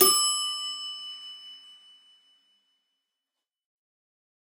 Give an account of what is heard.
toaster oven or lift/elevator bell
This is the bell from my Aunt Harriet's old toaster oven. It sounds exactly like a lift/elevator bell and can be used either for an toaster oven or else a lift/elevator bell sound.
Best for those looking for the sound of a realistic lift/elevator bell without background noise. It sounds exactly like the lift at the hotel my girlfriend works at in Manchester and like the exel lifts from when I lived in Winnipeg in Canada and in Connecticut aeons ago.
Aunt Harriet got this toaster oven around 1989, but I can't tell the make since she took the label off.
lift, ding, toaster-oven, bell, ring, toaster, chime